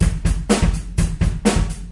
A straight drum beat with a busy kick pattern.
Recorded using a SONY condenser mic and an iRiver H340.